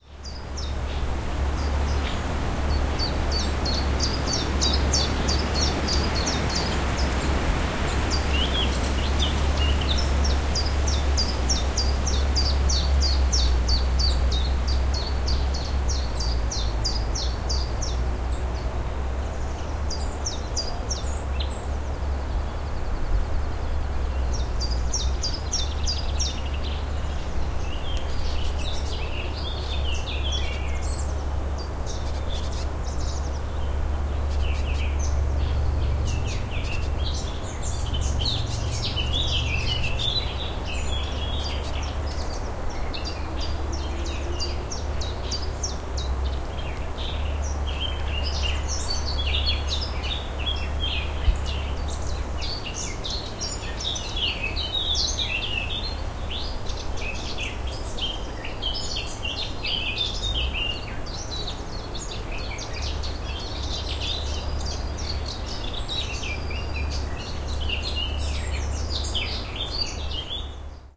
Sound of countryside. Sound recorded with a ZOOM H4N Pro and a Rycote Mini Wind Screen.
Son de la campagne. Son enregistré avec un ZOOM H4N Pro et une bonnette Rycote Mini Wind Screen.
agriculture, ambiance, ambience, ambient, background-sound, country, countryside, farmland, field-recording, general-noise, land, nature, rural